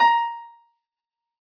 Piano ff 062